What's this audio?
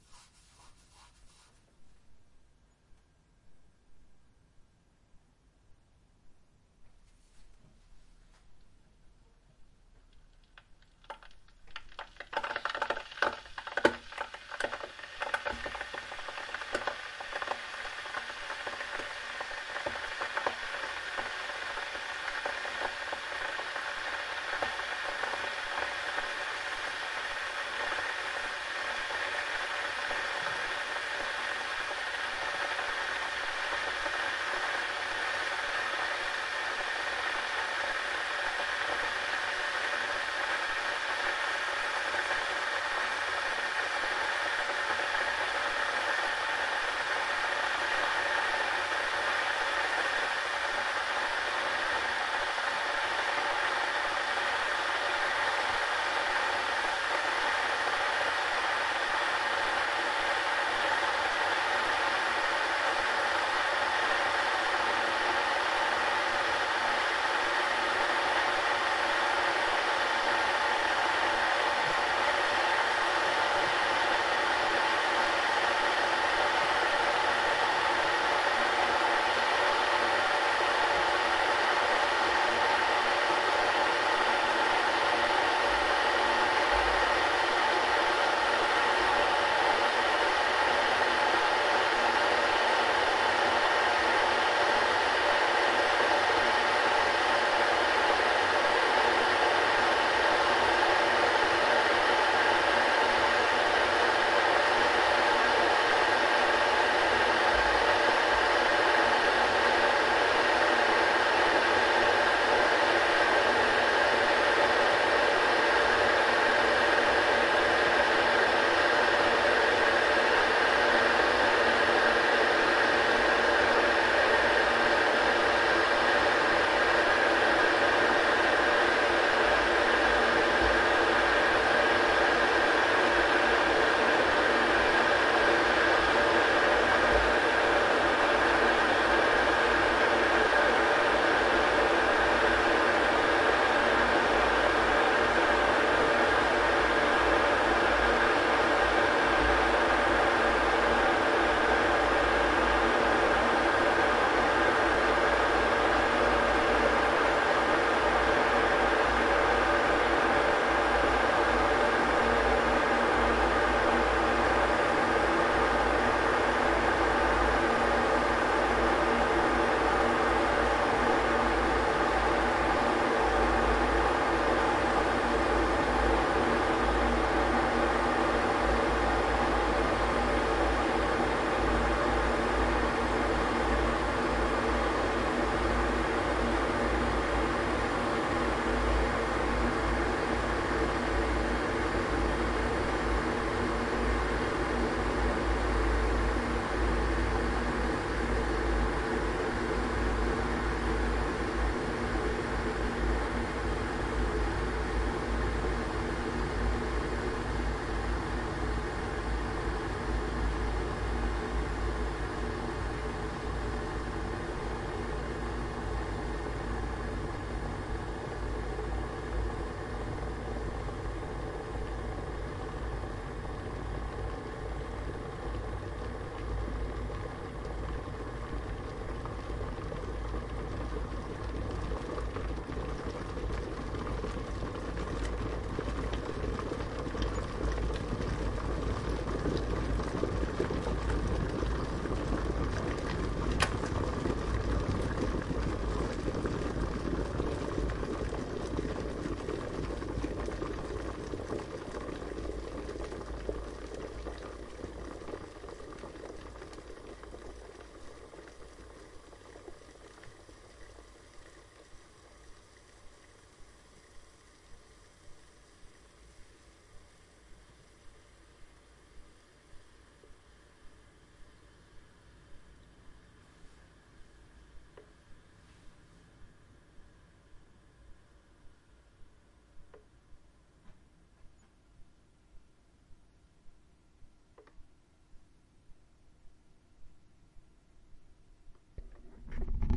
water boiler
Boiling water in an electric kettle